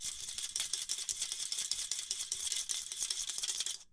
Tab in Can - Whirl
Swirling a broken off pop tab inside of a can.
soda, aluminum, rustle, rattle, energy-drink, swirl, can, pop, metal, tab